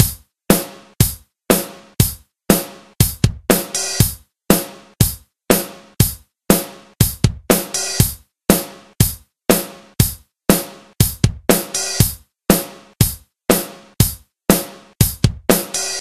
A drum pattern in 4/4 time. Decided to make an entire pack up. This is an ordinary 4-4 time drum pattern. I think I will do some more 4-4, 3-4 and 6-8 time patterns to add into here. The others I do will go into a separate drum patterns pack.